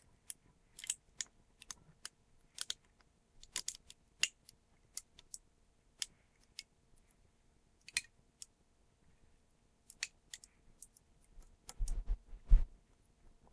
Me crushing a soda can with a seat clamp.